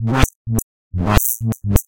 bassline dance a2 f2 128 bpm fizzy bass
trance, electro, house, dub-step, minimal, rave, club, dance, bassline, techno